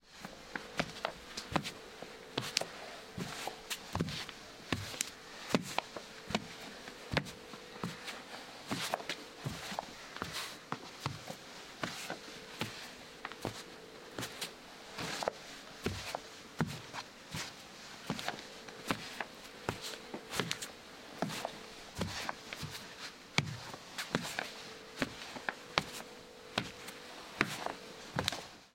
walking on pavement